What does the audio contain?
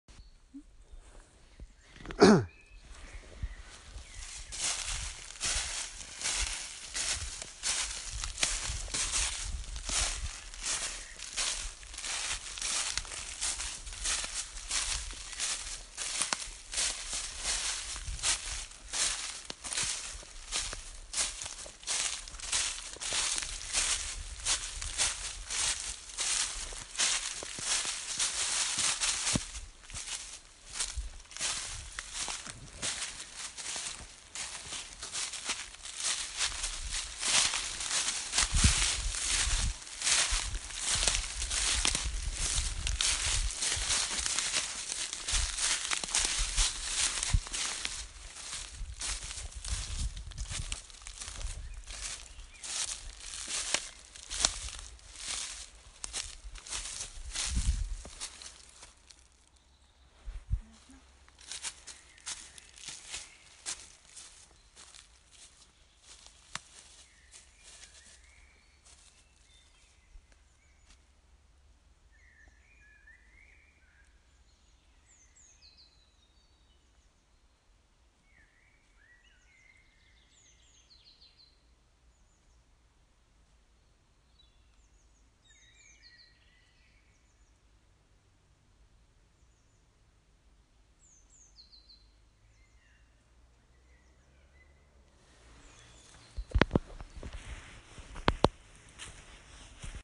Leaves Walking Forest Late Afternoon Vienna
Leaves Walking Forest Late Afternoon near Vienna